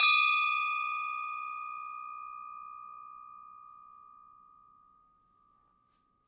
Paolo Soleri windbell from the Consanti bell foundry, Arizona.